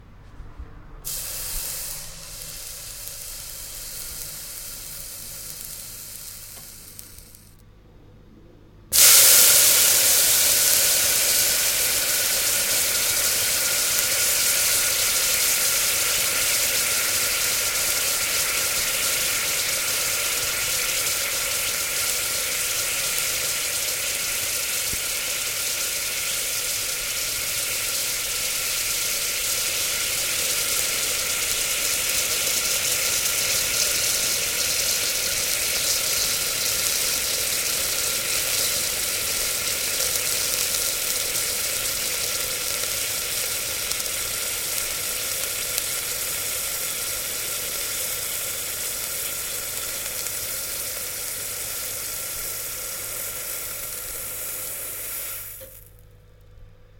Water evaporating once dropped onto a hot pan - take 6.
evaporate, water, ice, steam, vapour, kitchen, hiss